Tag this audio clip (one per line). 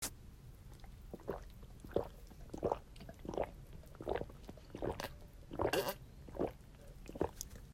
field-recording
human
natural